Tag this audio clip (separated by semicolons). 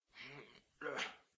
human; stretch